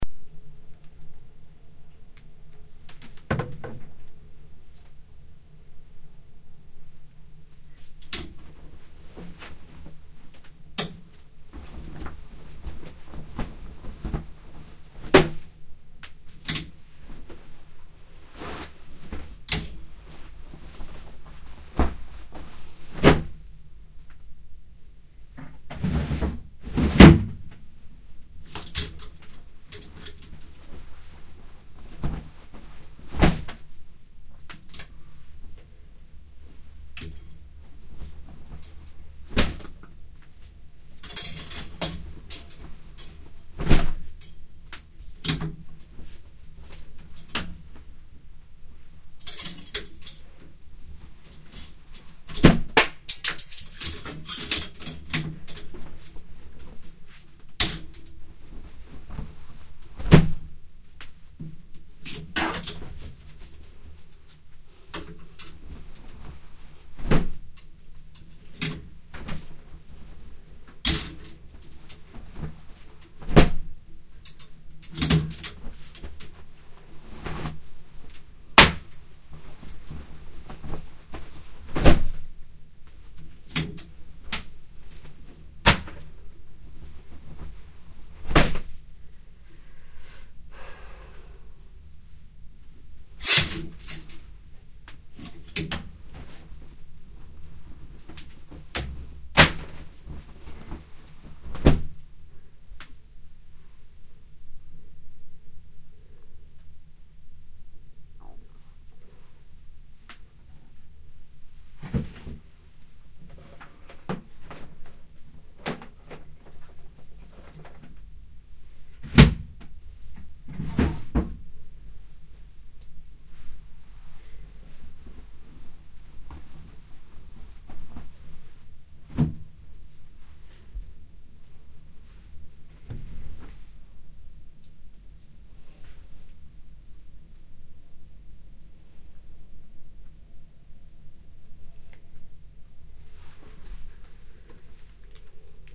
Packing a suitcase with clothes off hangers. Fast and angry. Stereo binaural recording.
stereo, angry, furious, suitcase, foley, hangers, hanger, packing, clothes, pack, binaural